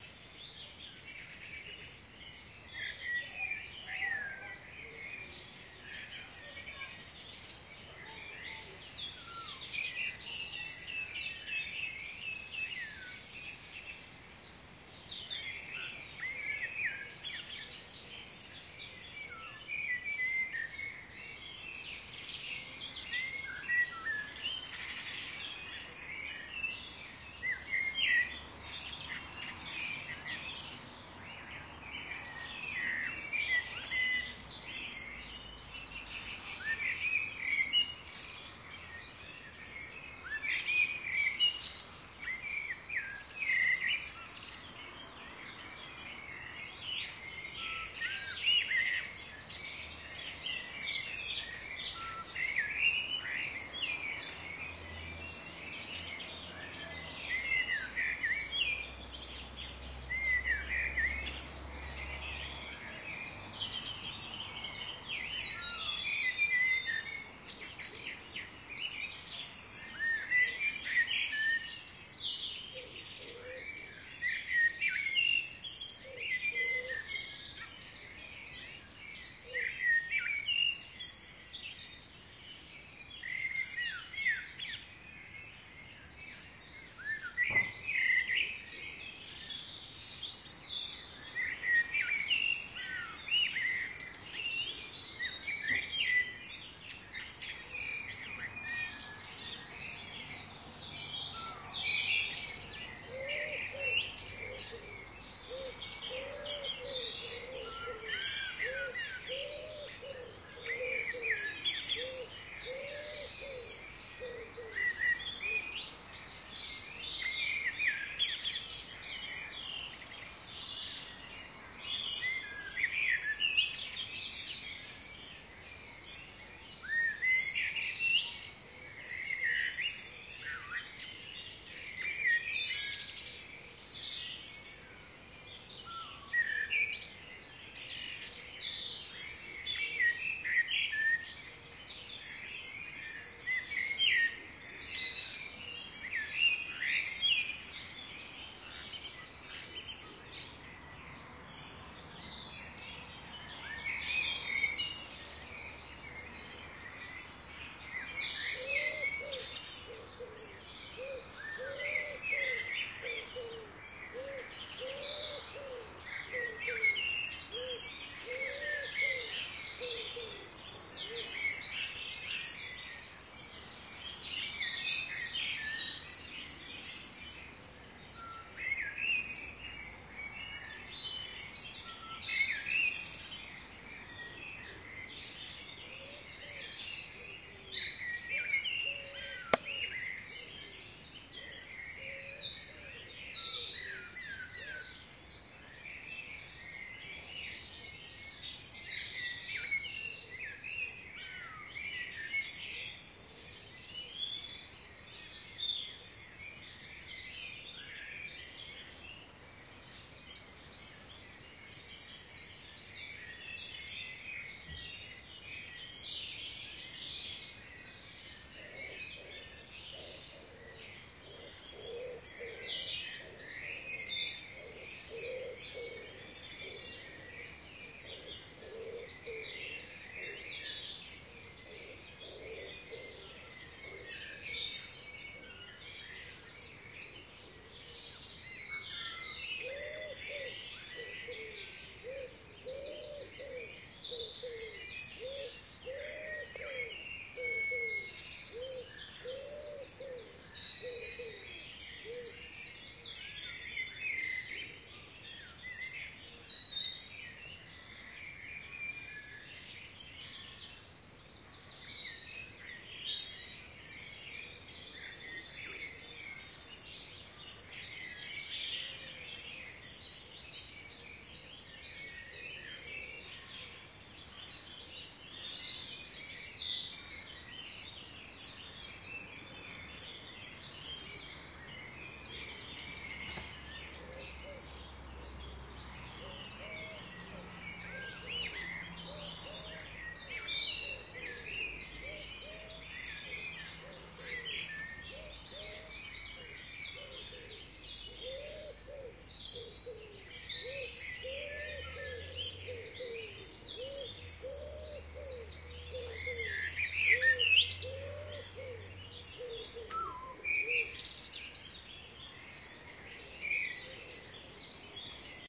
Birds - Urban 5am UK
Early morning (5am) birdsong in urban back garden. Some distant traffic sounds can be heard. UK, East Anglia. Recorded on Zoom H2.